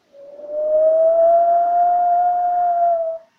The sound of a wolf howling, or crying
cry, animal, wolf